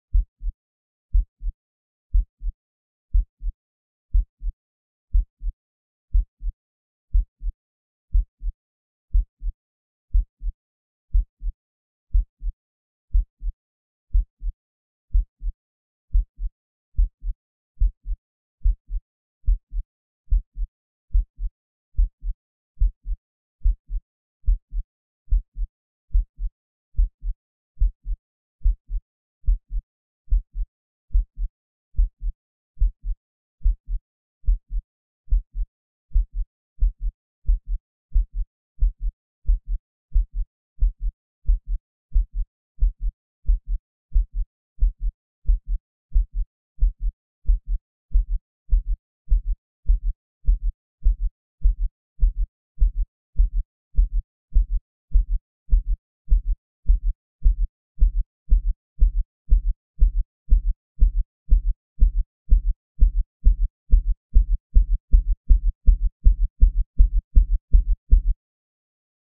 Heartbeats, increasing rhythm
A synthesized heartbeat, increasing slowly in rate. Perhaps from stress, fear or exercise? The sound was made by playing a sine wave with a very short decay, layering it and reversing it to imitate the push and pull sound of the heart.
Produced in FL Studio.
Heartbeat, rhythm, rushing, heartbeats, chest, blood, heart, valve, scared, beat, beats, panic